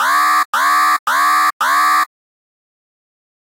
1 alarm long d
4 long alarm blasts. Model 1
gui, futuristic, alarm